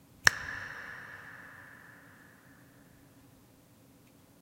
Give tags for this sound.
audio church clap compact dreamlike echo effect hall hands impulse ping reverb snap sound unearthly weak

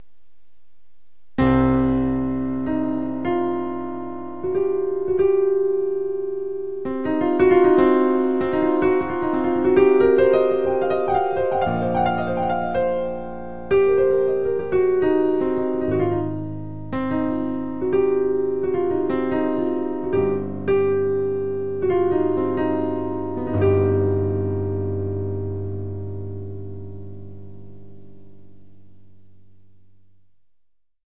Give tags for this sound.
piano music jazz

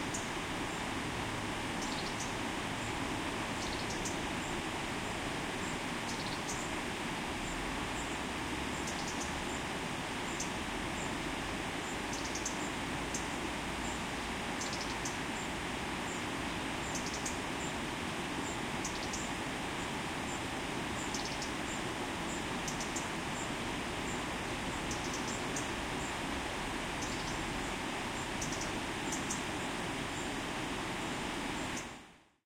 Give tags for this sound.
2021 Albarracin ambiance antique Creative-Europe cultural-heritage field-recording natural-heritage residency river river-path sound-art

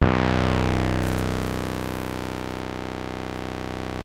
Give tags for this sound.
Minibrute Samples Synthesizer

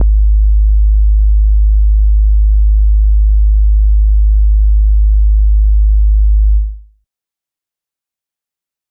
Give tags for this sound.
bass low

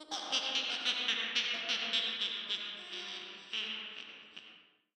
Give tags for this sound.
Witch Laugh Cackle

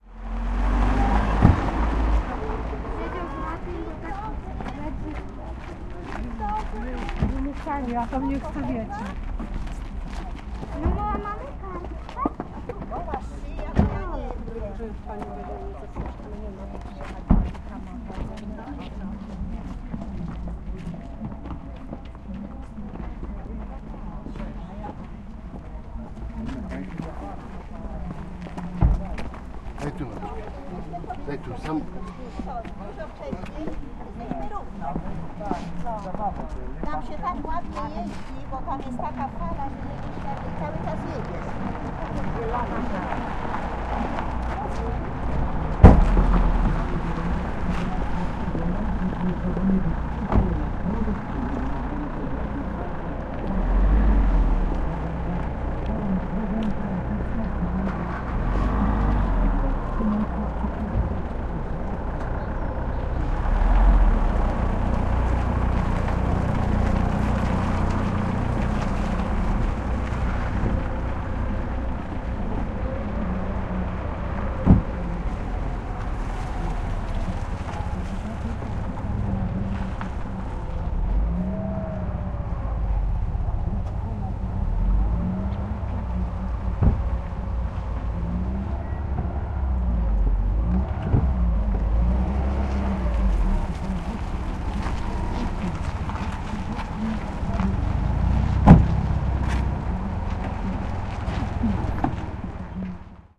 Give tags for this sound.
Sunday gowo people ambience Kaszuby Poland village parking Pr fieldrecording car

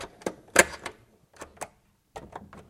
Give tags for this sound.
buzz latch machine mechanical whir

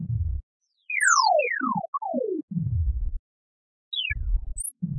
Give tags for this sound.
comet synth space image meteor meteorite

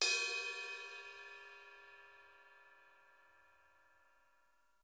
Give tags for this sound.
cymbal drums percussion

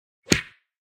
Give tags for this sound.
slap fist boxing-punch punching hit punch-sound-effect fight-punch punch fight boxing

